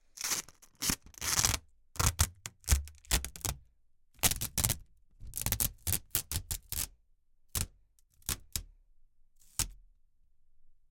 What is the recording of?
Unwinding scotch-tape. You could hear the crackle and low-frequency sound of the tape vibrations.
Mic: Pro Audio VT-7
ADC: M-Audio Fast Track Ultra 8R
scotch-tape, unwind